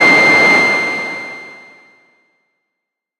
Blip Random: C2 note, random short blip sounds from Synplant. Sampled into Ableton as atonal as possible with a bit of effects, compression using PSP Compressor2 and PSP Warmer. Random seeds in Synplant, and very little other effects used. Crazy sounds is what I do.
110, acid, blip, bounce, bpm, club, dance, dark, effect, electro, electronic, glitch, glitch-hop, hardcore, house, lead, noise, porn-core, processed, random, rave, resonance, sci-fi, sound, synth, synthesizer, techno, trance